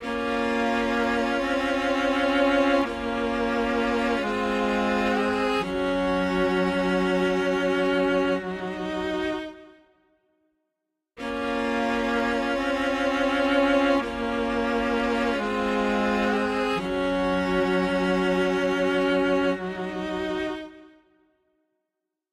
Three or four chords and a little melody (for the background, I guess). Another one with a tweaked (pitch-tuned etc.) cello. Will sometime use this myself, too.